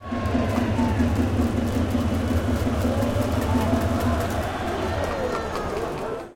nagoya-baseballregion 25
Nagoya Dome 14.07.2013, baseball match Dragons vs Giants. Recorded with internal mics of a Sony PCM-M10